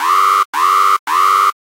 2 alarm long c
3 long alarm blasts. Model 2
gui, futuristic, alarm